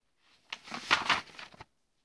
Big Paper
Recorded while flipping a big old blue print
Blue-print, Book, Flip, Page, Sheet